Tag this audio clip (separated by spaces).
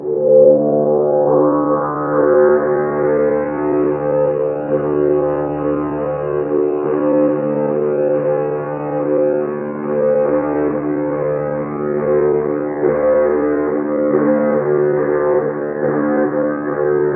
drone synth